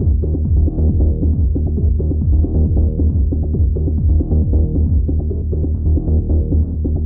loud club music 01
Small self composed music loop filtered to sound like it's heard from outside/next door.
Including my name (prefered spelling: "TitanKämpfer", if the work's font allows it) somewhere in the credits is enough. Linking to this profile or the sound source itself is fully optional, but nice to see.
ambience, club, electronic, filtered, loop, music, next-door, outside, synth, techno, trance, wall